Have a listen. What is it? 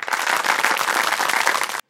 This is a short applause sound effect. Layering 38 recordings of me clapping. Recorded using a Mac's built-in microphone. It is perfect for an acheivement, accomplishment, etc. sound in video games.
applause, clapping, accomplishment, acheivement, clap, crowd